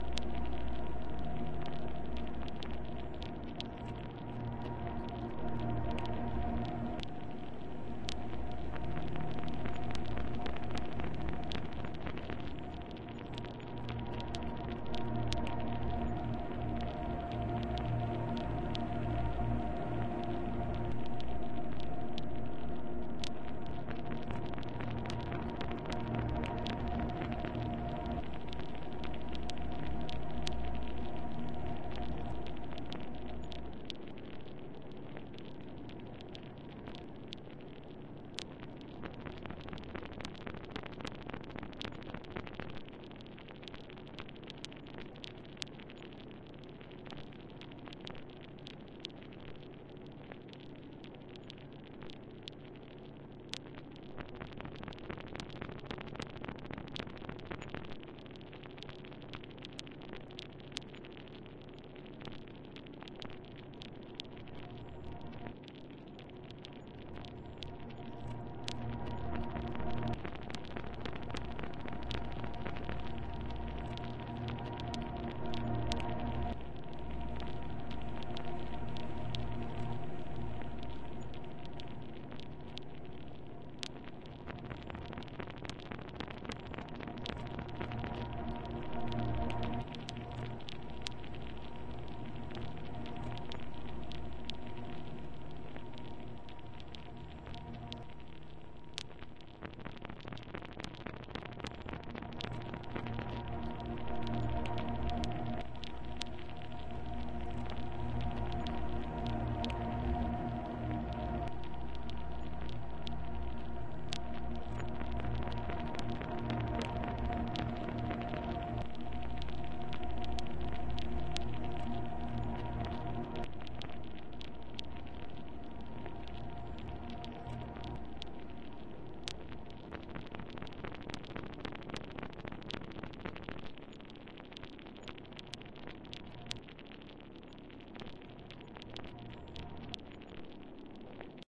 A cold background noise with subtle texture and glitches.
ambient, sound-design, atmosphere, click, cold, icy, lonely